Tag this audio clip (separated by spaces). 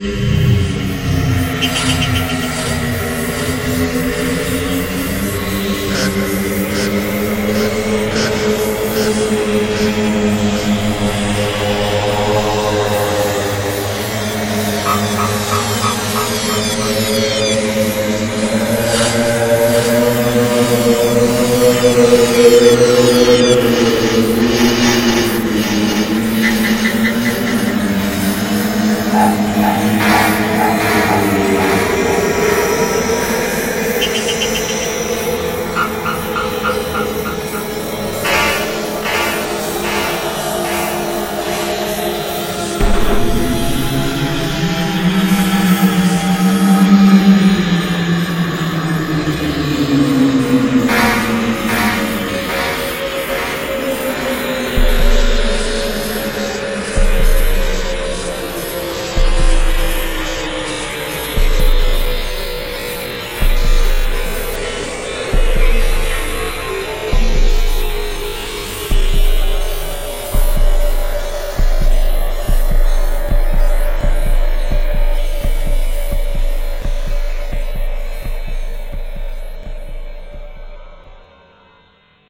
Alien Ambient Audio Background Creepy Dub Dubstep Effect Electronic Funny halloween Noise Sound Spooky Synth Weird